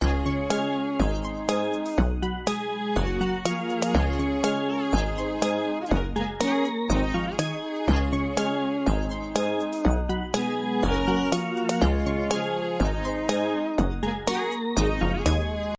An uplifting musical loop.
bass, digital, happy, musical, soundtrack, synthesized